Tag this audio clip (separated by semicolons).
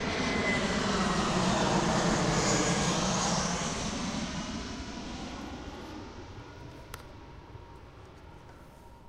elprat; airplanes; Deltasona; wind; airport